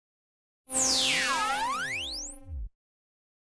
Time-Machine Down:Short
Wierd Sound Thing